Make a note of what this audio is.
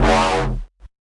dub wub
Made this wob in massive
Bass, Wobble, Wah, Dubstep